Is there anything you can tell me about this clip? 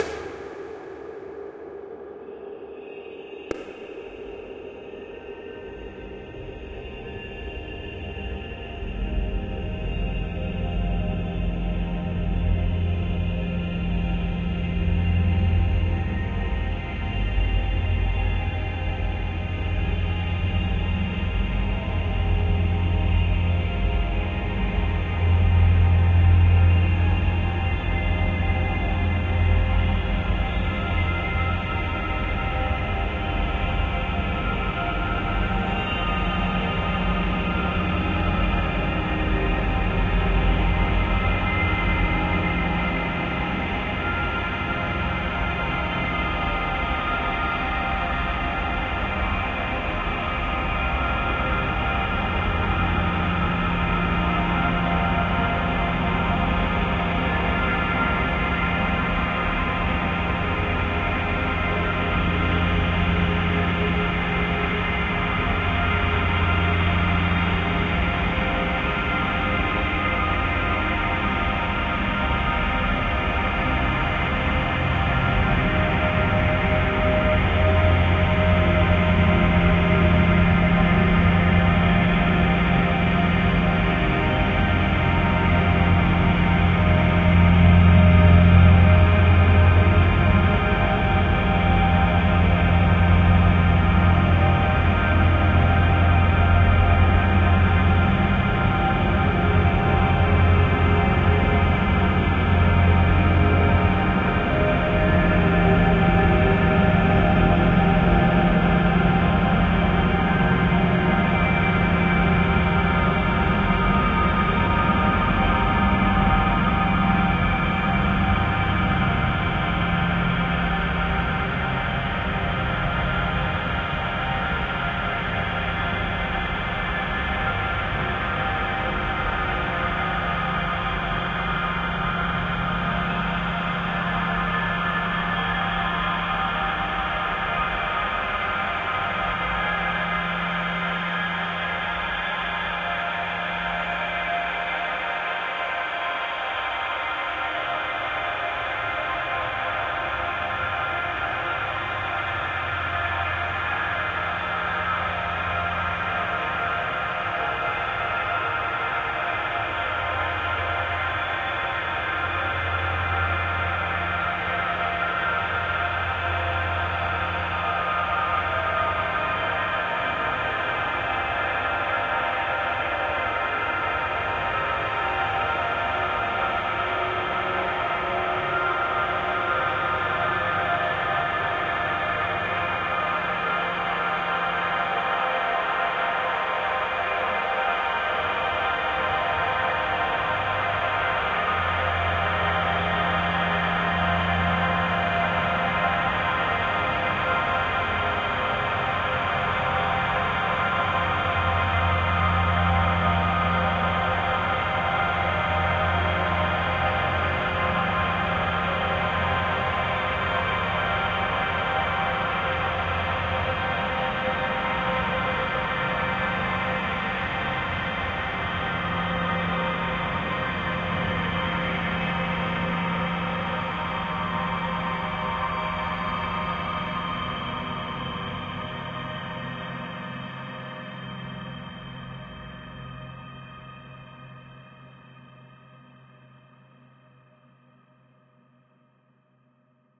LAYERS 011 - The Gates of Heaven is an extensive multisample package containing 128 samples. The numbers are equivalent to chromatic key assignment. This is my most extended multisample till today covering a complete MIDI keyboard (128 keys). The sound of The Gates of Heaven is already in the name: a long (exactly 4 minutes!) slowly evolving dreamy ambient drone pad with a lot of subtle movement and overtones suitable for lovely background atmospheres that can be played as a PAD sound in your favourite sampler. At the end of each sample the lower frequency range diminishes. Think Steve Roach or Vidna Obmana and you know what this multisample sounds like. It was created using NI Kontakt 4 within Cubase 5 and a lot of convolution (Voxengo's Pristine Space is my favourite) as well as some reverb from u-he: Uhbik-A. To maximise the sound excellent mastering plugins were used from Roger Nichols: Finis & D4. And above all: enjoy!